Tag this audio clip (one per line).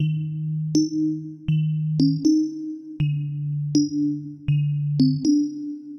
slow
cinematic
edit
loop
interesting
string
line
movie
bass
calm
sequence
film
snyth
outro
intimate
intro
bright